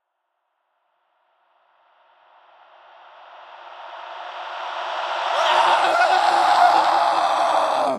scream revers reverb revers
scream, reverb, reversed